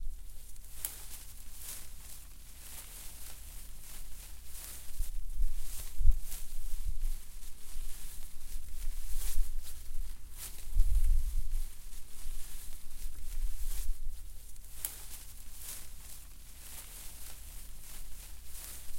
Something moving through the bushes
Something ( my dog ) following you through the bush not so subtly
bushes, nature, scrub, stalker